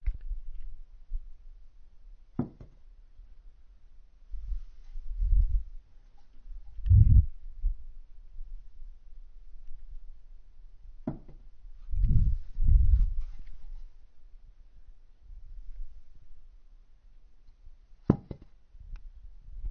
Falling Plank
A small wooden plank falling over, making a soft thud. Recorded with an H1 zoom. There is some noise in between the impacts.
carpet, falling, impact, plank, soft, thud, wood